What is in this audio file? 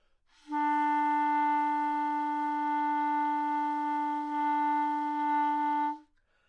overall quality of single note - clarinet - D4
instrument::clarinet
exercise::overall quality of single note
note::D4
microphone::neumann
tuning reference::442
Intentionally played to produce an example of ** air inside **